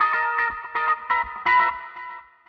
A little riff with a little bit of distorsion. It's a funny loop